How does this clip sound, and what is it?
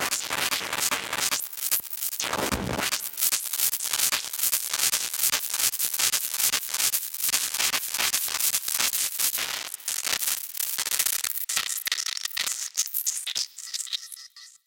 Analog Sandstorm was made with a Triton, and 2 Electrix effect processors, the MoFX and the Filter Factory. Recorded in Live, through UAD plugins, the Fairchild emulator,the 88RS Channel Strip, and the 1073 EQ. I then edited up the results and layed these in Kontakt to run into Gating FX.